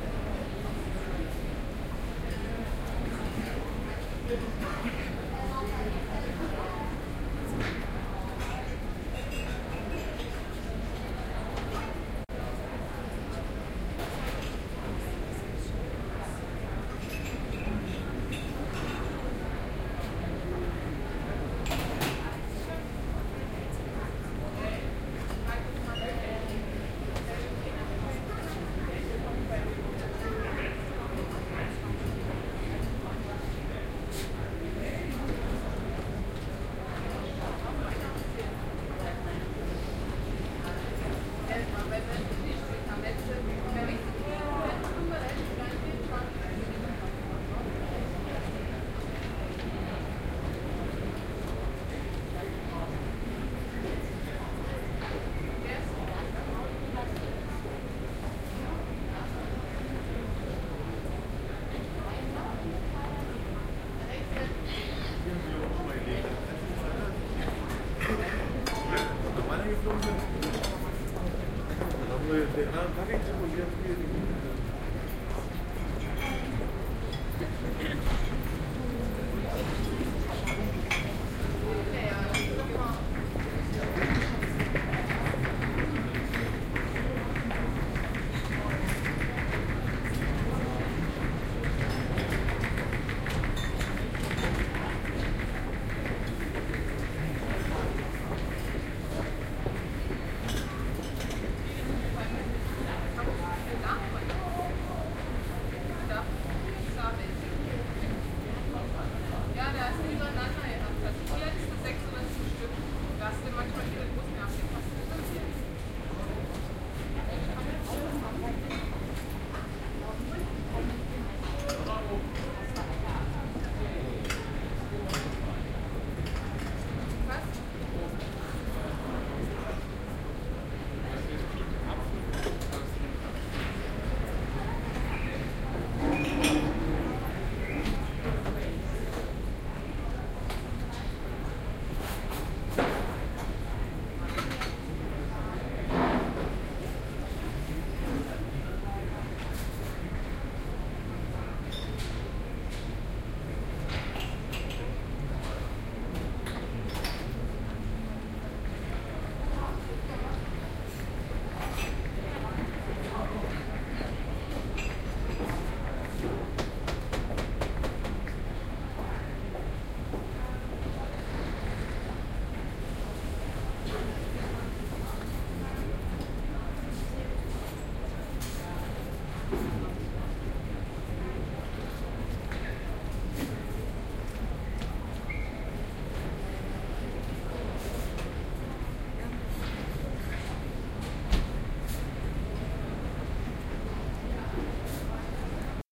Airport Café
A Cafe in the main building of Berlin Schoenefeld airport. Not very "airportish", but still some nice ambient sound. Soundman OKM II microphones and Sharp Minidisk MD-DR 470H.